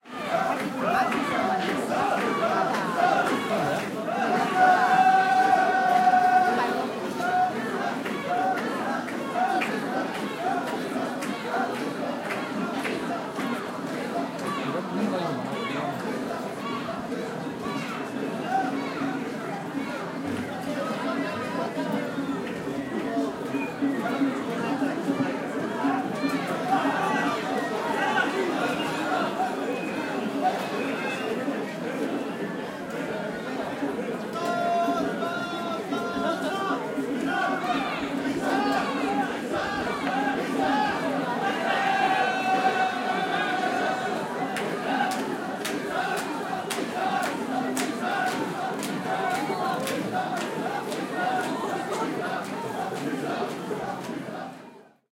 Tokyo - Chanting Crowd
A group of people chanting as they carry a Mikoshi through the streets during the Asakusa Sanja Matsuri in May 2008. Recorded on a Zoom H4. Unprocessed apart from a low frequency cut.
asakusa, chant, crowd, female, japanese, male, matsuri, sanja, street, tokyo, voice